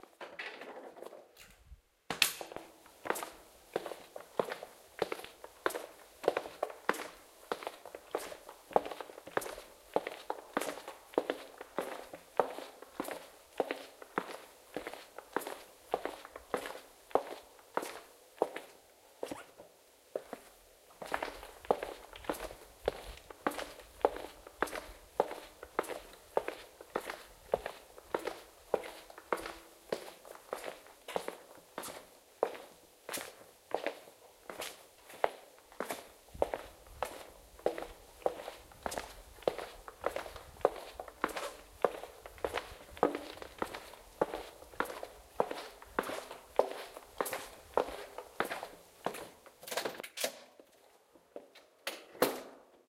FootSteps in a Concrete Corridor 2
some foot steps in a concrete corridor.
i tried to reduce the noise a bit,
but i couldn't manage to remove it completely without losing too much of the quality.
recording equipment: zoom h4n
concrete, corridor, door-open, door-shut, foot-steps, paces, steps, walk